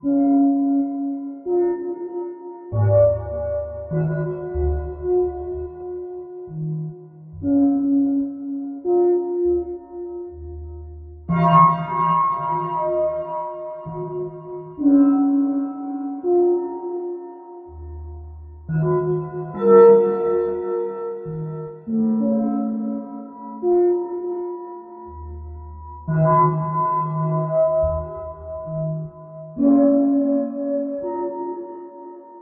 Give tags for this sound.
gong; resonant; percussion; metal-percussion

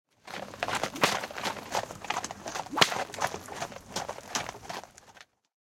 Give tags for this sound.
driving; exterior; horse; wagon; whip

